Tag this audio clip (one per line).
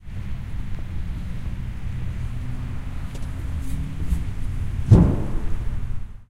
large
steps